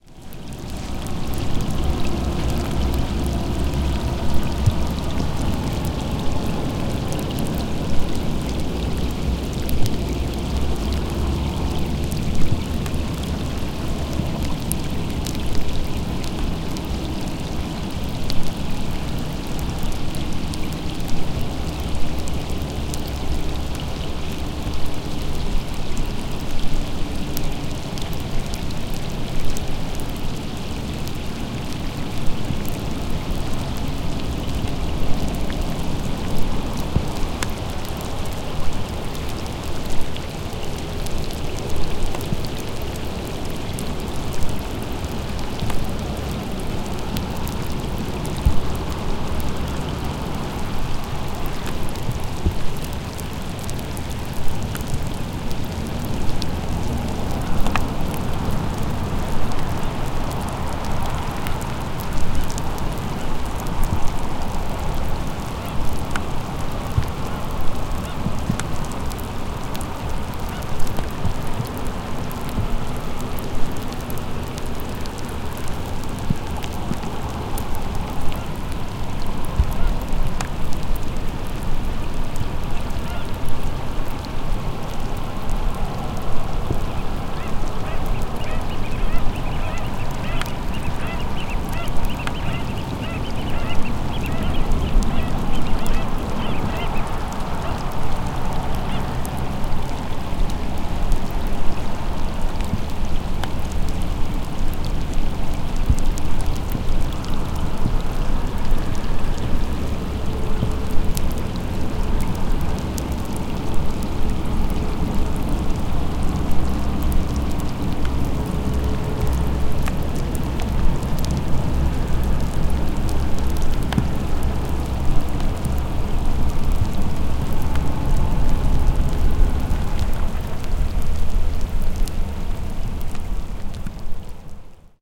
Lake Boga Evening
Recorded at Lake Boga in Victoria, Australia. There was a light shower.
Recorded using my Zoom H4 with a Rycote wind sock.
Australia, Boga, Lake-Boga, Murray, Murray-river, ambience, atmos, atmosphere, australian, birds, car, cars, distant-cars, evening, field-recording, industrial, lake, nature, night, pelican, pelicans, rain, river, rumble, wetlands